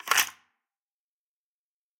Button plastic 1/4
A Click of an old casetterecorder.
Could be use as an sound for a menu or just sounddesign.
Hit me up for individual soundesign for movies or games.
Button, Casette, Click, Machine, Press, Tape, effect, foley, game, home, horror, menu, plastic, sounddesign, trash, video